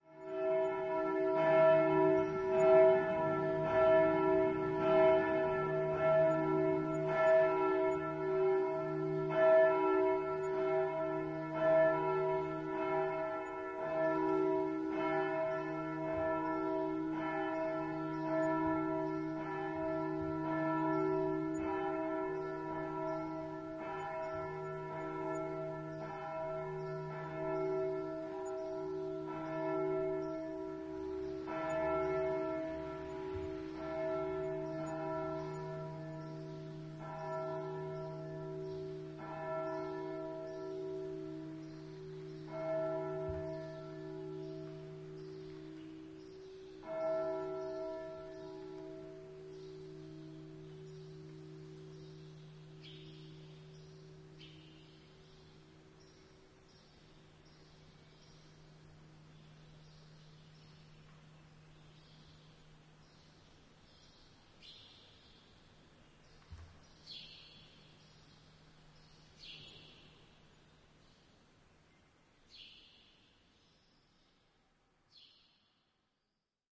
End of bells ringing in a very small church in Miradoux, France, Gers. recorded from the inside. Sorry I didn't have the beginning of the ring, cause many people on the set were making noise. Many birds around.Recorded with MS schoeps microphone through SQN4S mixer on a Fostex PD4. decoded in protools